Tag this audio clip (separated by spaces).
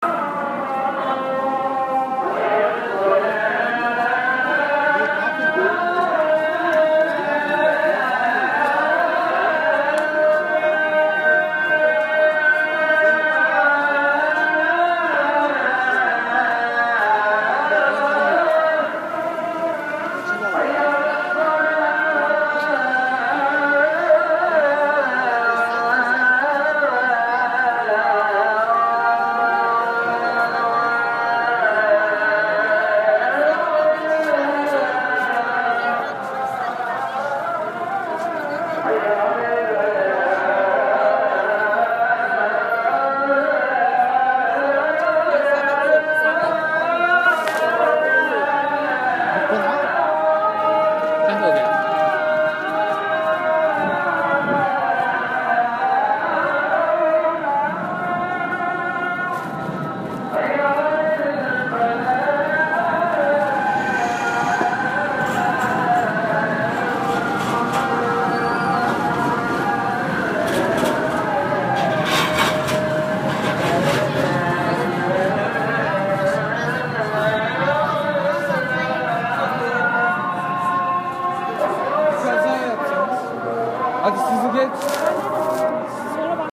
environment field-recording Grandbazaar street tram